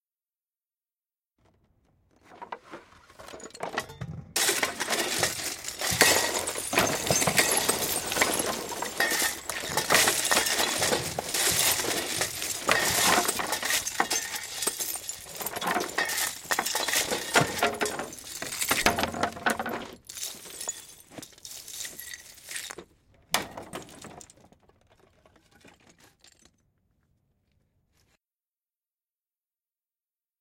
Debris Sifting Dry